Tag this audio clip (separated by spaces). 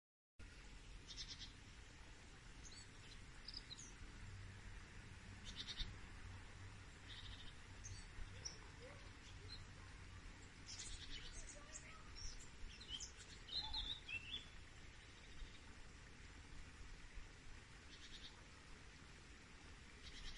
birds nature spring twittering